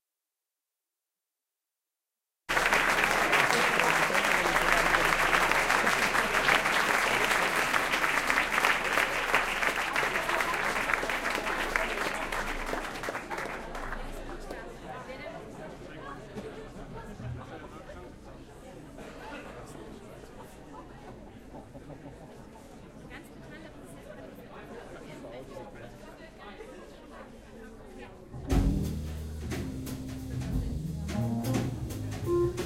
I recorded a concert of my own and this is the crowd in the beginning, with a little applause
unprocessed, people, applause, crowd, mutter, concert, ambience